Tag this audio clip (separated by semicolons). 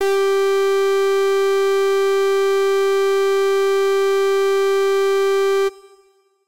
synth; synthesizer; brass; fm-synth